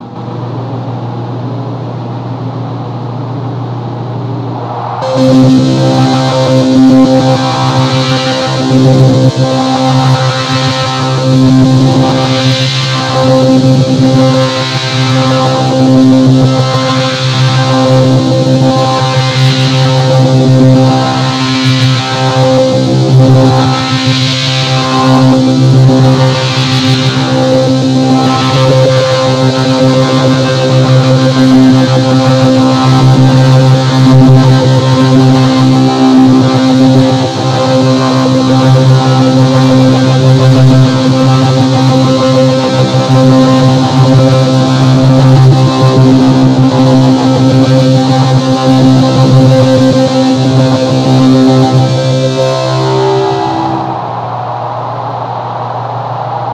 A synth sound with lots of distortion and wha.